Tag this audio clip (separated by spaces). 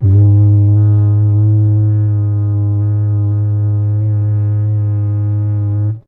brass cardboard multisample trumpet tube